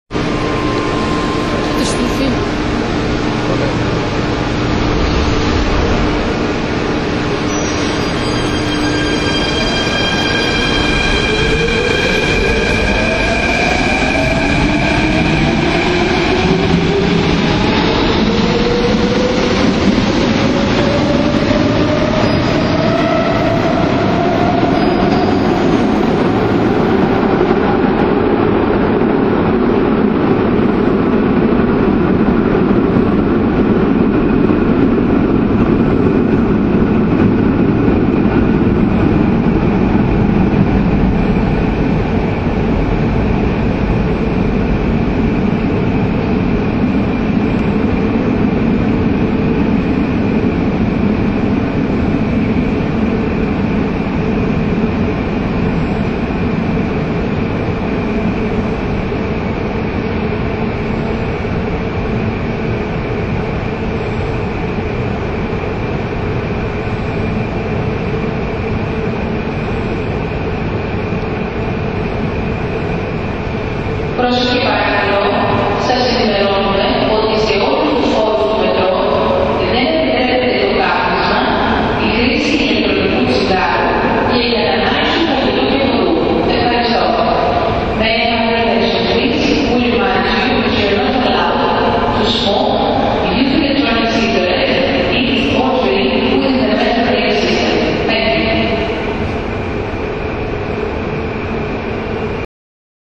athens metro 2
athens, greek, metro, train